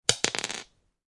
Dés jetés (pan) 10
dice noise - alea jacta est
dice, ambient, misc, noise